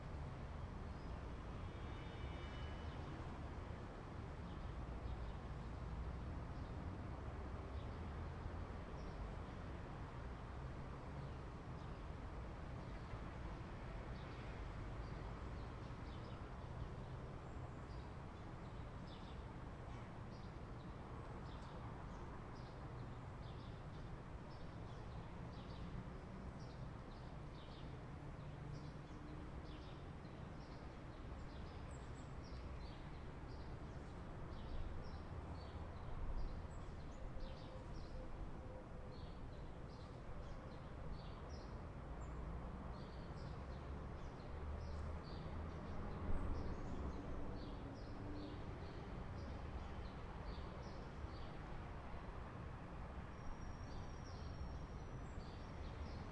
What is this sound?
soundscape in front of Technical faculty in Rijeka